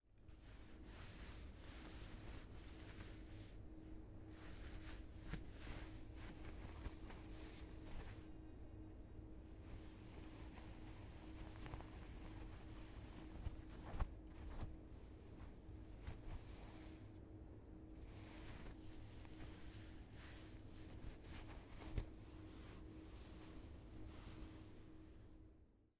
Recorded with a zoom H6. Rubbing clothes against one another to create body movement.
rubbing, movement, moving, clothes, OWI, body